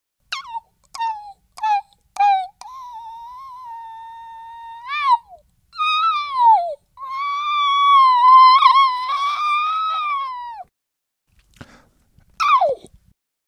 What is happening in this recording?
Making squeaky noise with my throat. Recorded on (stationary) MiniDisk. Microphone: Dynamic Ramsa WM-V001E. No Reverb.